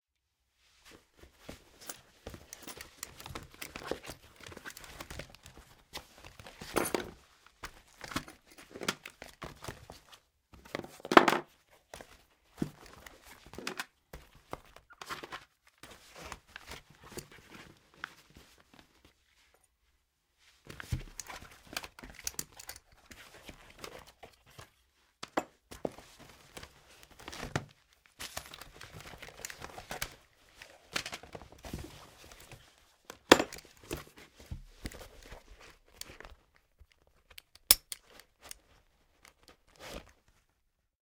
FOLEY briefcase handling
briefcase, handling